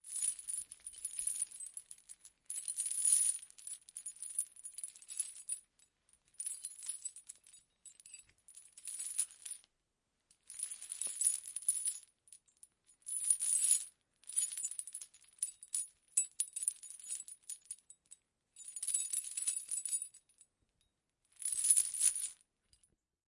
Recoreded with Zoom H6 XY Mic. Edited in Pro Tools.
Rusty old chain clinking.
chain, clink, metal